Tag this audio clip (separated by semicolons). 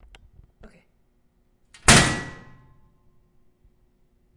abstract; prison; ship; space